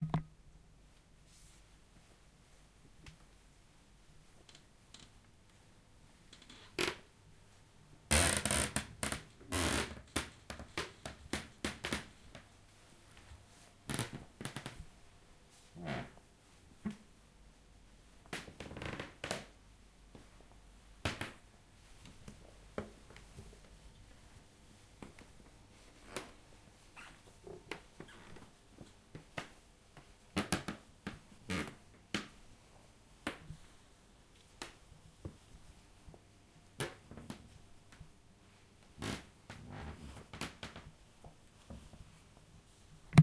walking on a squeaky floor
Walking on a floor that is very creaky
creaky, floor, floorboard, hardwood, house, old, squeaky, walking, wood